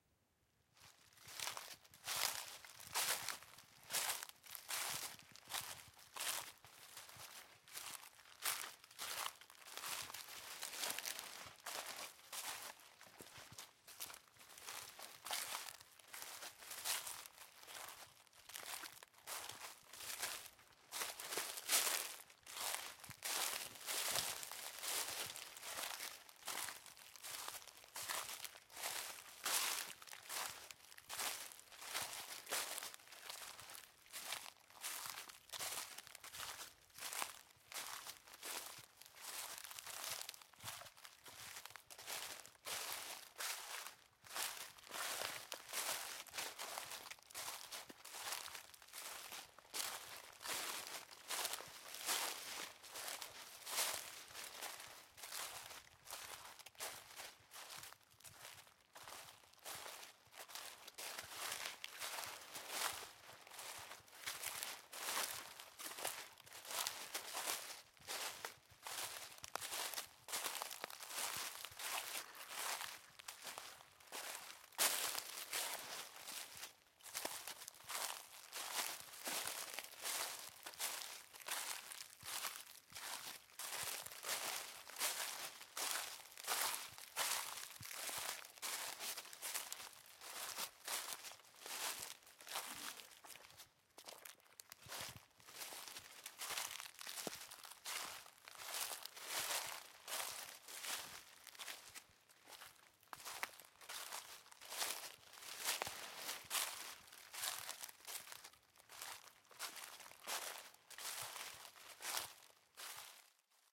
Walking On Dry Leaves
A stereo field-recording of walking on dry broadleaved woodland leaves. Zoom H2 front on-board mics.